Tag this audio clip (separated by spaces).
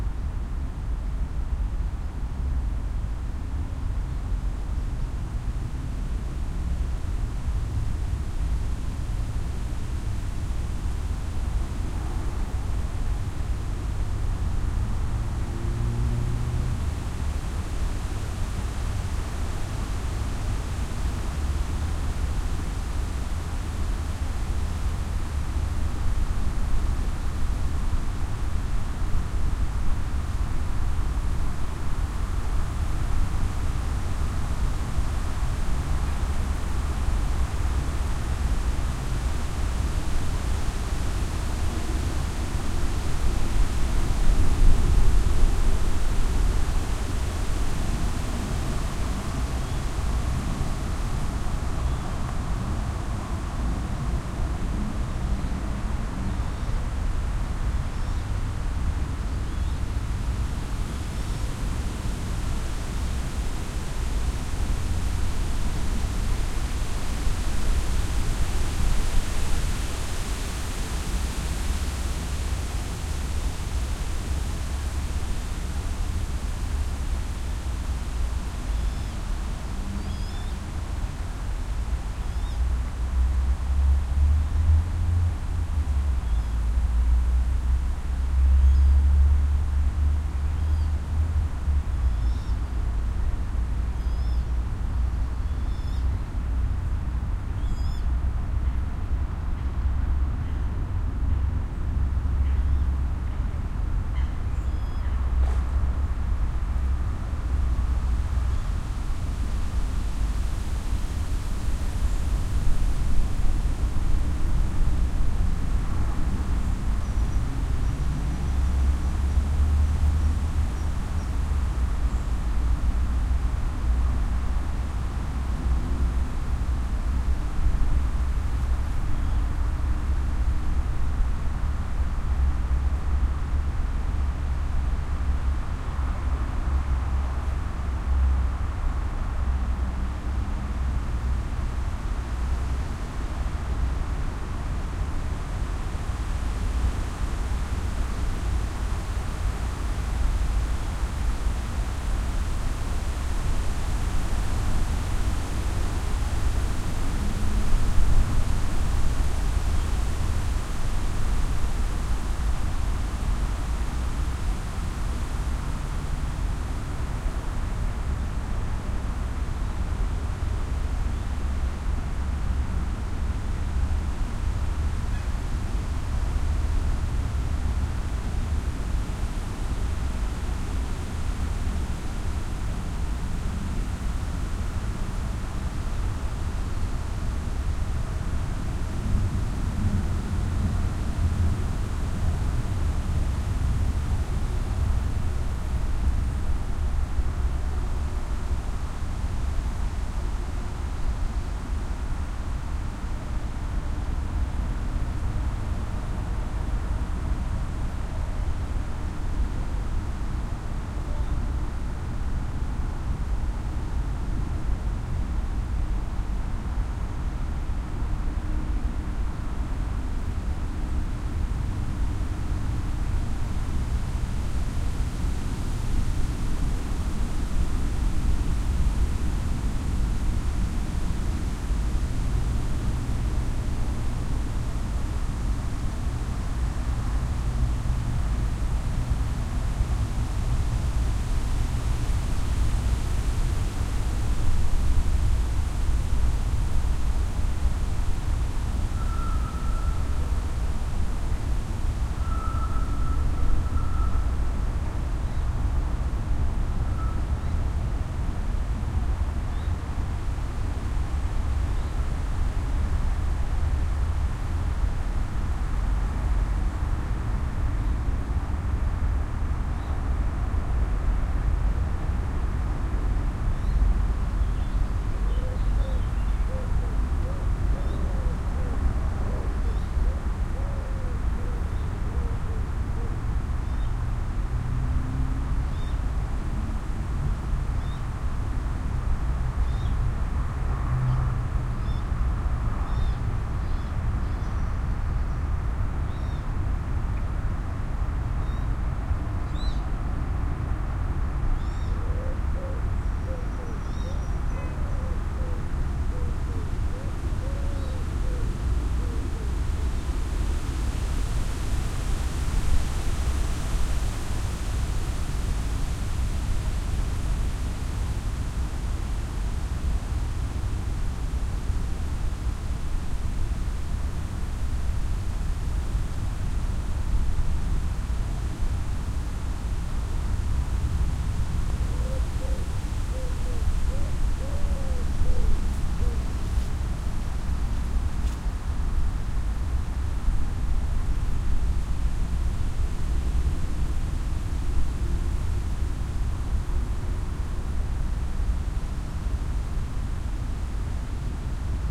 cemetery field-recording white-noise wind windy